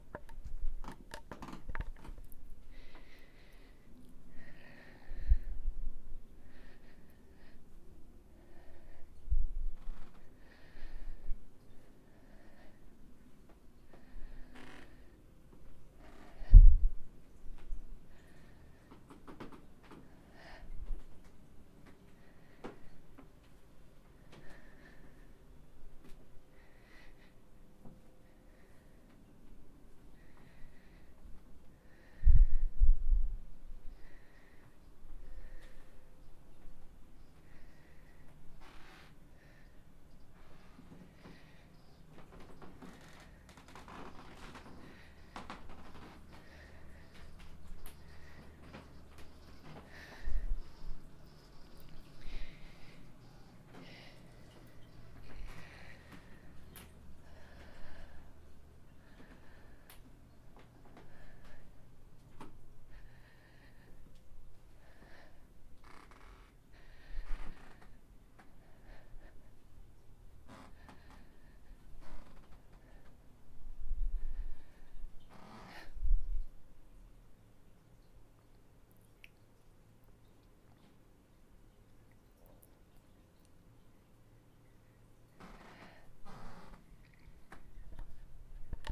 Creaking wooden floor and heavy breathing
Me walking on a creaky wooden floor with soft shoes, also with added heavy breathing. I used this for a tense ghost-hunting scene where they were slowly exploring a dark room. Recorded on H4N.
breathing, creaking